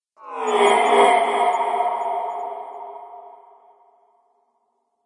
Sci-Fi Whoosh
A Sci-Fi sound effect. Perfect for app games and film design. Sony PCM-M10 recorder, Sonar X1 software.